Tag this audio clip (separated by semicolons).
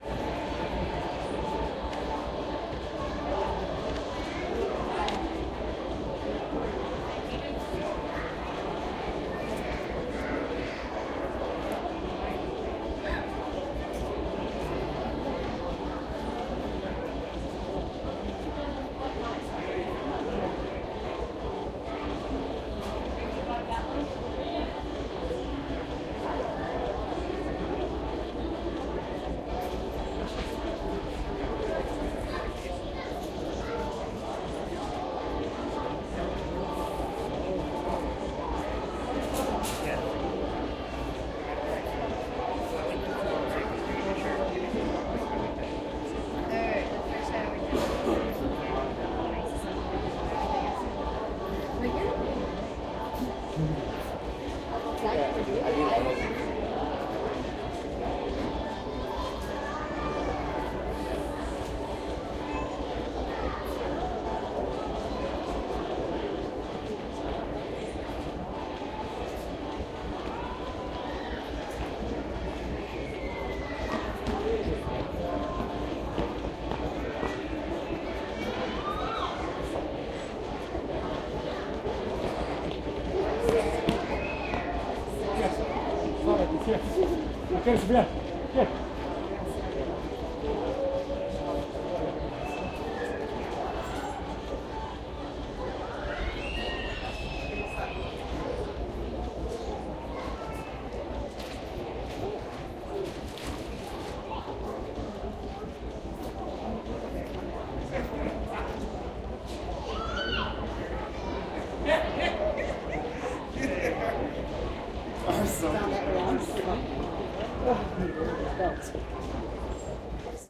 ambience; America; Department-store; shop; shopping; store; US